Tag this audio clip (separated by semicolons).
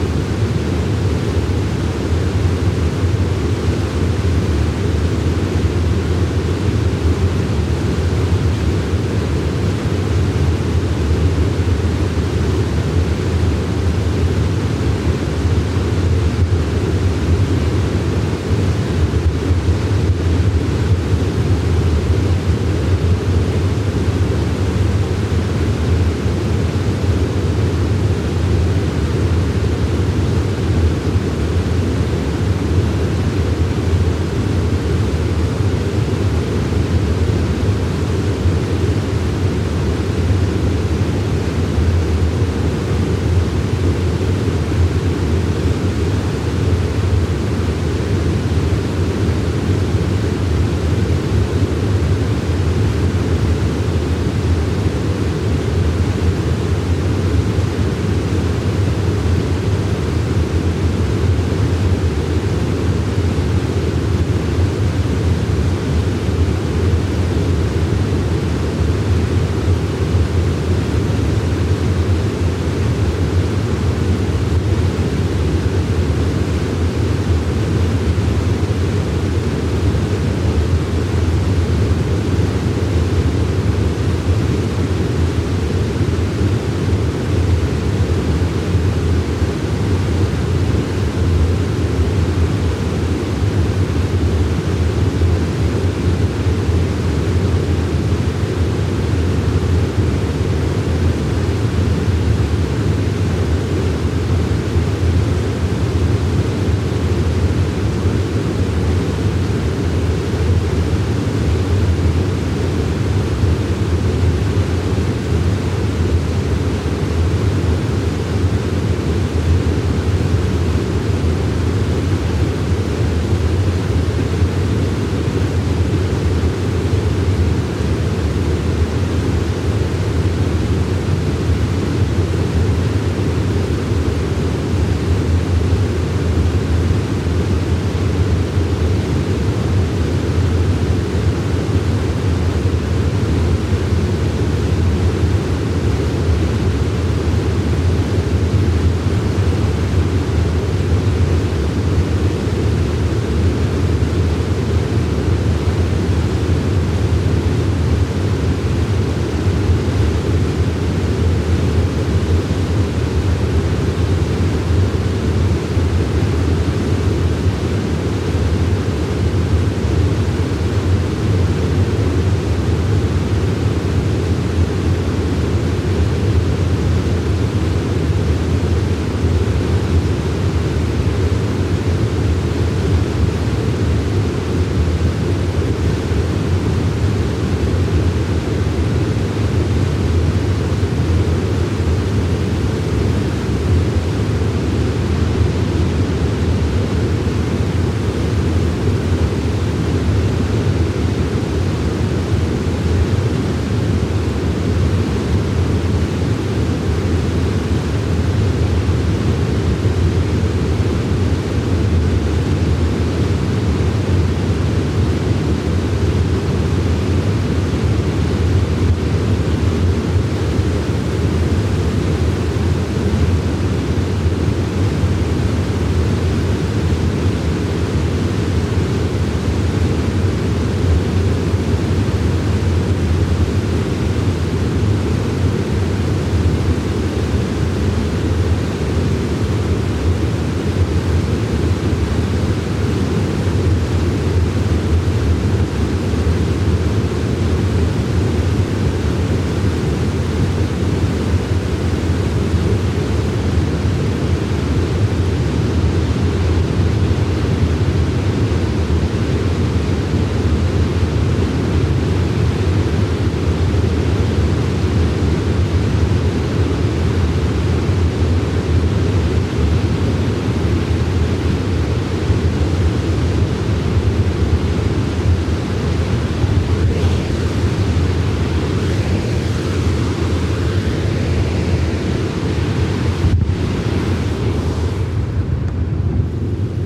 field-recording; Nature; oregon; stream; waterfall